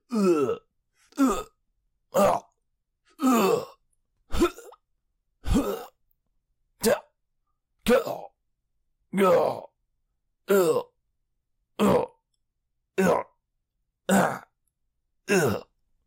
Grunts Various Male
Various male grunts, such as being hit, dying, getting hurt.
combat, hurt